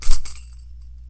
Percussion kit and loops made with various baby toys recorded with 3 different condenser microphones and edited in Wavosaur.
kit, percussion, shake, tamborine, toy